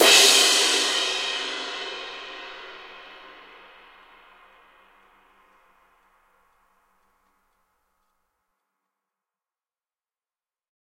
Skiba205Edge
A custom-made ride cymbal created by master cymbal smith Mike Skiba. This one measures 20.5 inches. Recorded with stereo PZM mics. The bow and wash samples are meant to be layered together to create different velocity strikes.
stereo, cymbal, drums